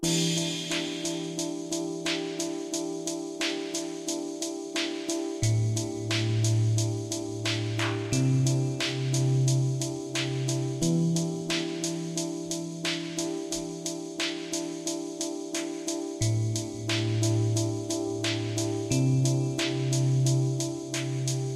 Loop DreamWorld TheCircus 04
A music loop to be used in storydriven and reflective games with puzzle and philosophical elements.
game, gamedev, gamedeveloping, games, gaming, indiedev, indiegamedev, loop, music, music-loop, Philosophical, Puzzle, sfx, Thoughtful, video-game, videogame, videogames